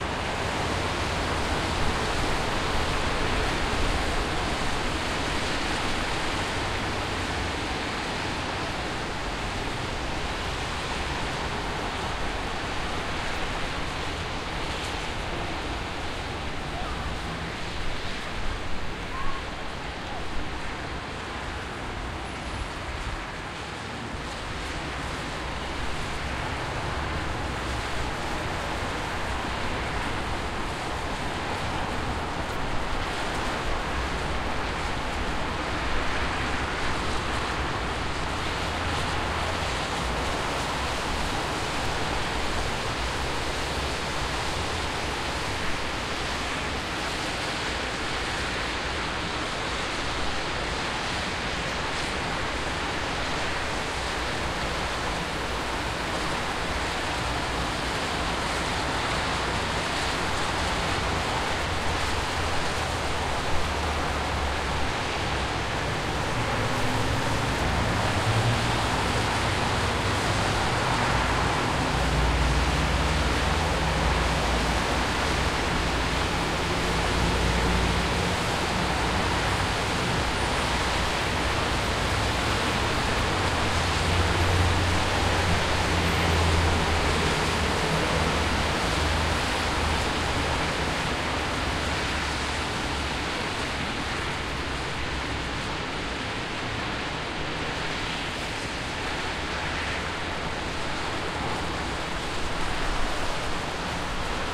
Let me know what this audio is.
cars on wet street stereo
Noise of cars on the street after rain. M-m-m... no... small rain is falling.
Recorded: 2012-10-19 09 pm.
XY-stereo variant.
Omsk, Russia, West-Siberia, cars, city, door, noise, rain, street, wet-door, wet-street